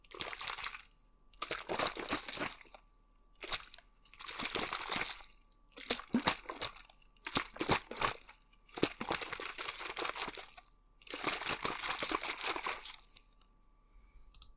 Water Shaking in Bottle
Shaking a water bottle!
bottle, shaking, water